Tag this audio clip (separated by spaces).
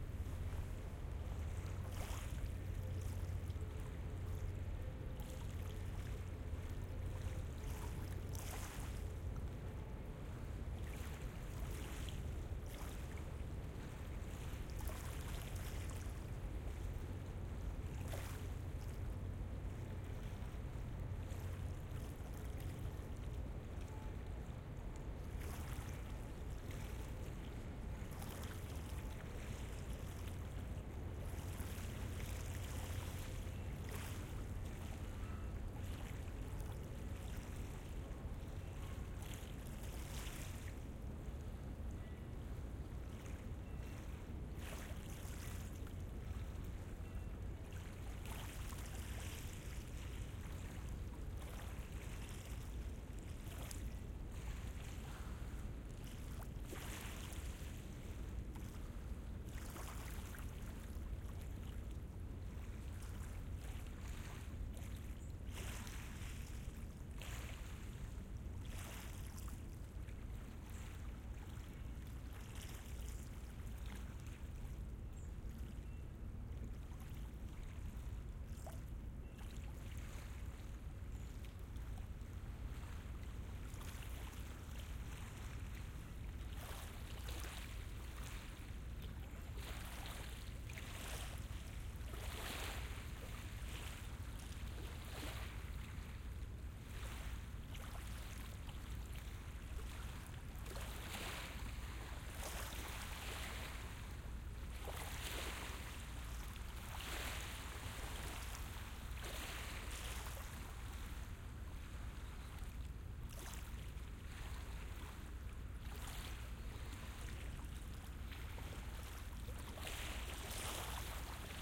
Ambeo Ambience field-recording Sennheiser